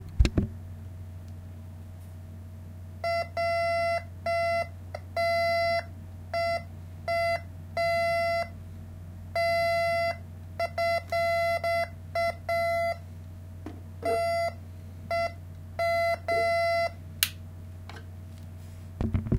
A metal detector beeping multiple times using a zoom H1 recorder.
beep, metal-detector, error, alarm, beeping, warning, testing